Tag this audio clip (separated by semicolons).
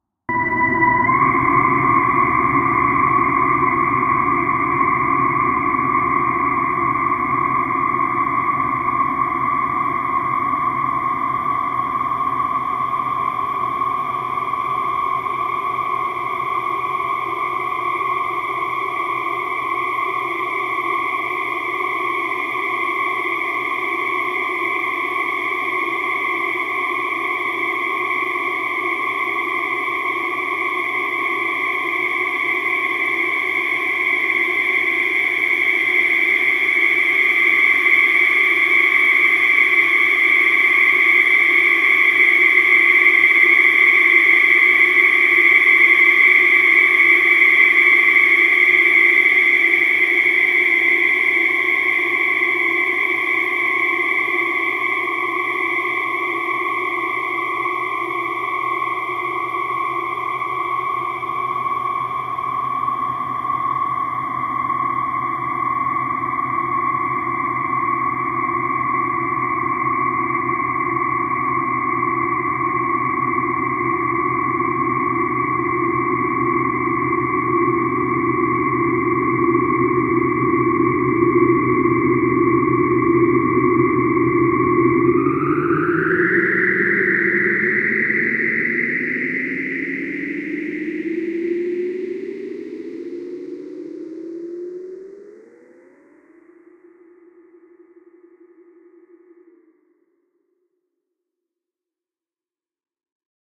aliens; drone; extraterrestrial; falling; freeze; metro; outer-space; sci-fi; space; ufo